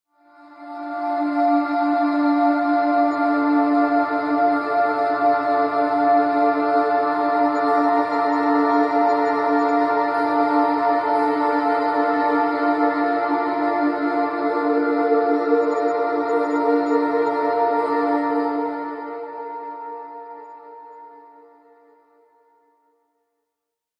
Vocal Scape 001 (A# - 120)
Vocal Scape incl. fx Key: A#, BPM: 120.
It's not a loop, but recorded at 120 bpm.
Cinematic, Epic, Movie, Voices